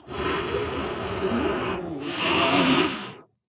Monster wheezing 3
A monster wheezing.
Source material recorded with either a RØDE Nt-2A or AKG D5S.